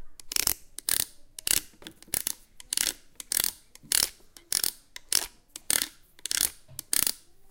mySound MES Chaima
Barcelona, Mediterania, mySound, Spain